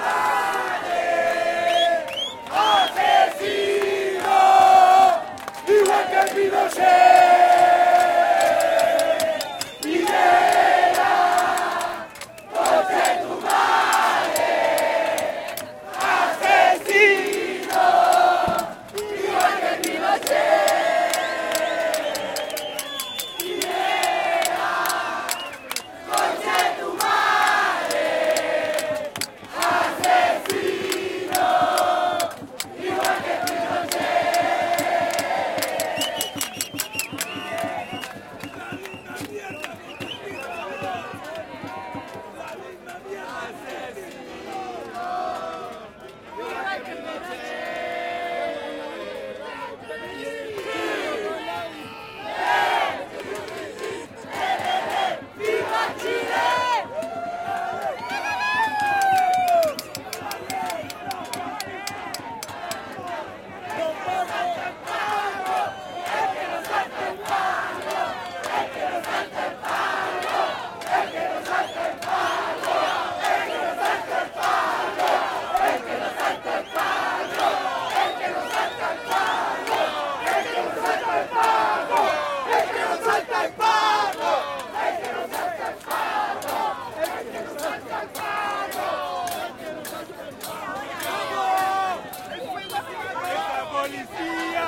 Protests in Chile 2019 Protesters singing "Piñera asesino igual que Pinochet" in Plaza de la dignidad. December 13, 2019.